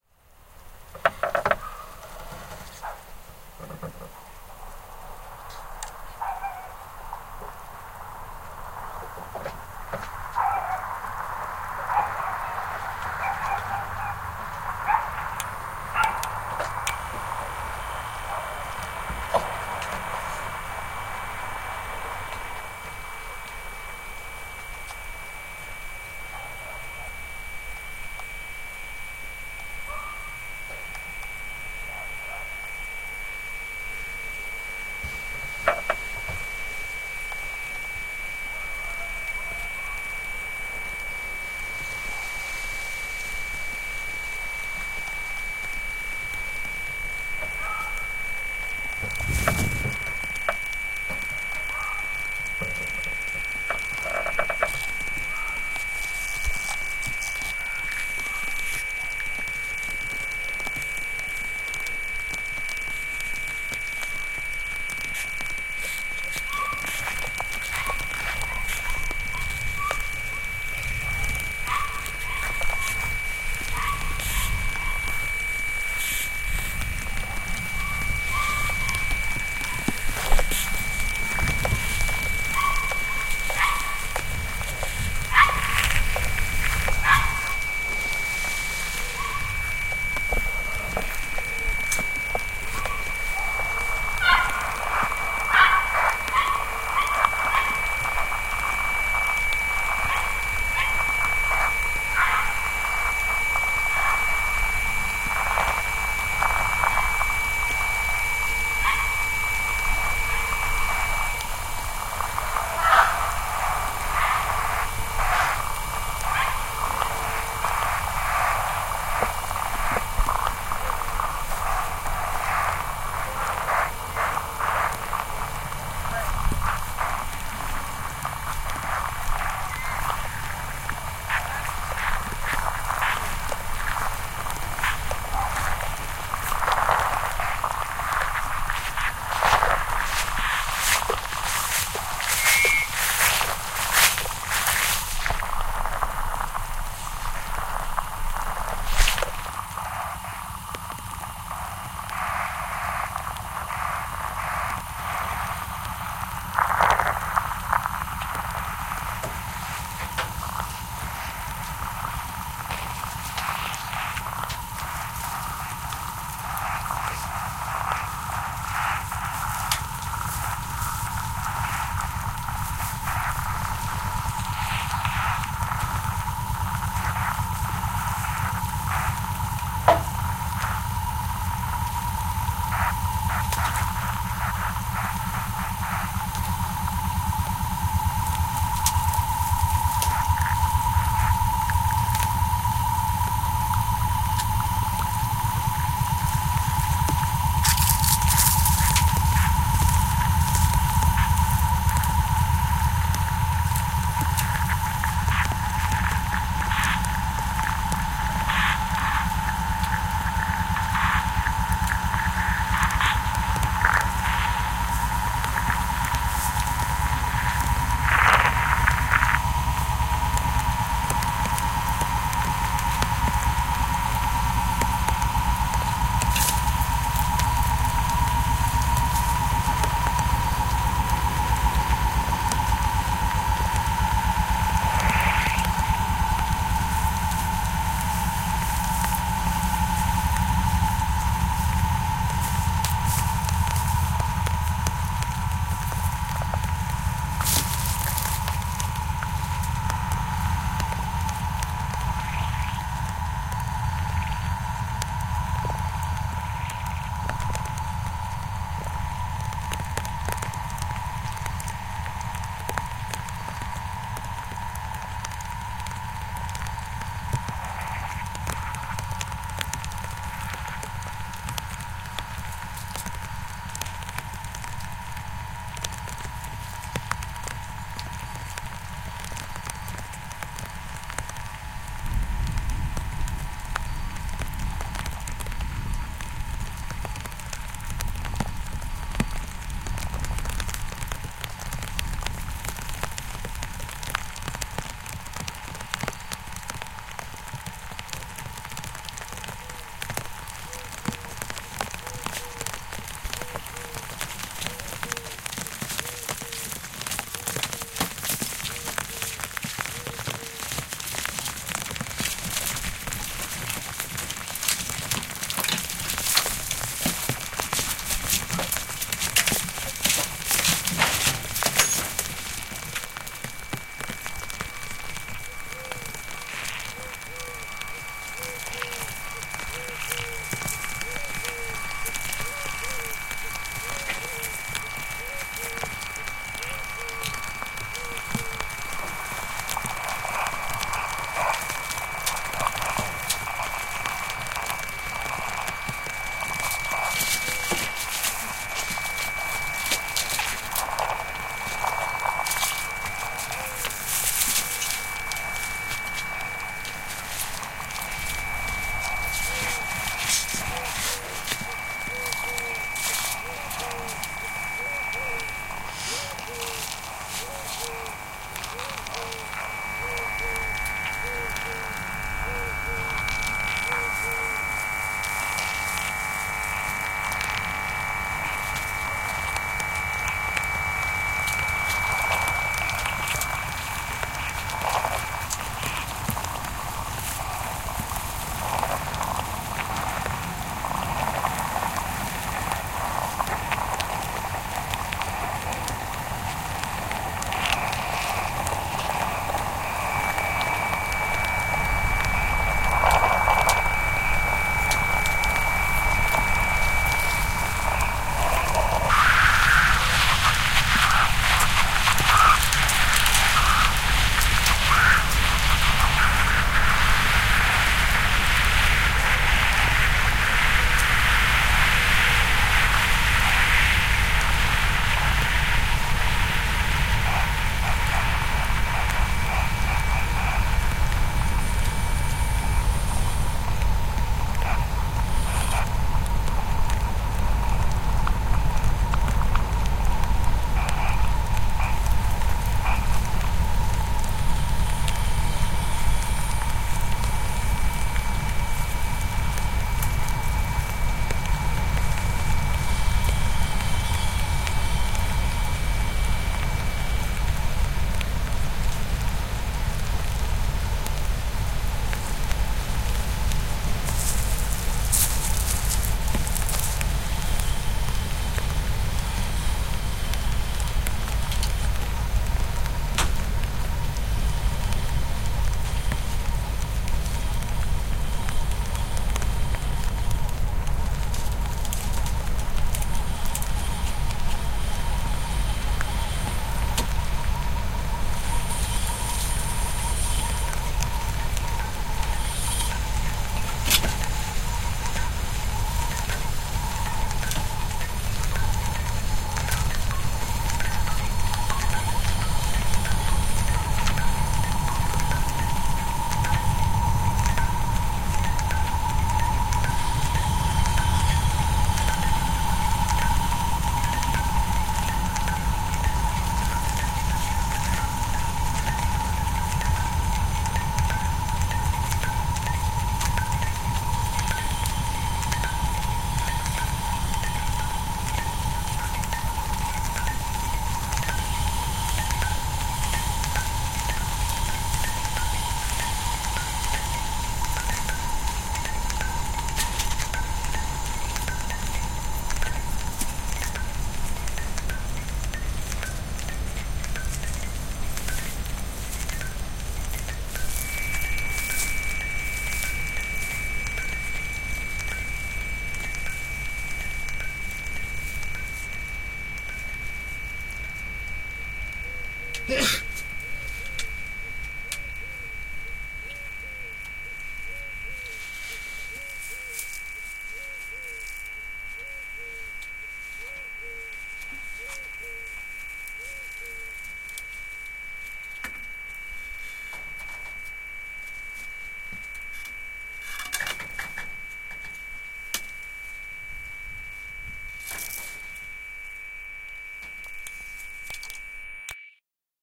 E. Mieville, Les champs du Buto blanc
Composition by french sound artist Emmanuel Mieville, using field-recordings from Normandy.
electroacoustic, field-recording, musique-conrte